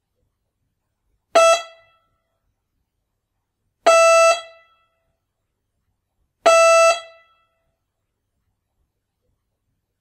A Berlin Door Bell
Just ringing my door bell. Pretending to be impatient.
Recorded with Zoom H2. Edited with Audacity.